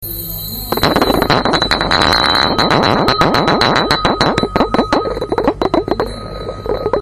cukraus dangtelis stoja2
metal lid spinning on various surfaces, hit by other objects
spin, metal, lid